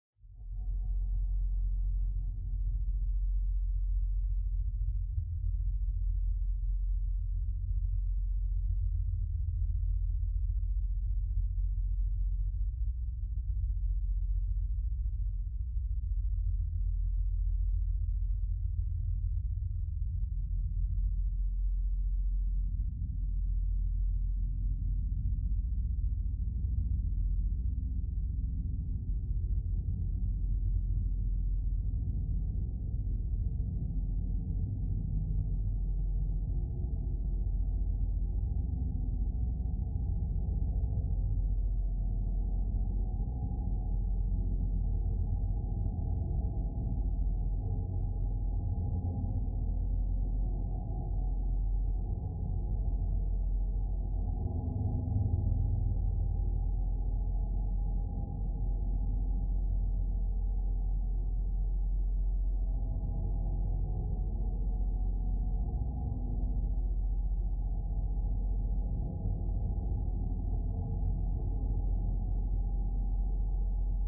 Atmospheric Rumble Drone
Just an Ambient Track that I made for a short film of mine. Not really a musician or anything but I just thought I'd share.